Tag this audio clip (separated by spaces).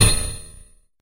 short stab